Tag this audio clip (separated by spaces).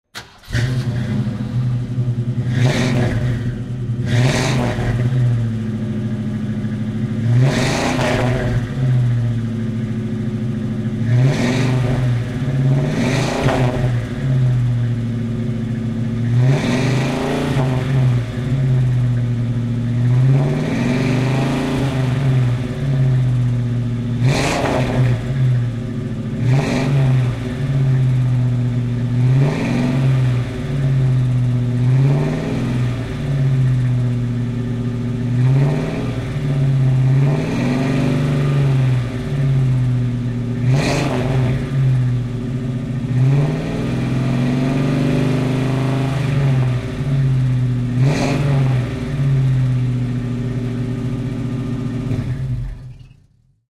automobile car engine ignition sports v-6 vehicle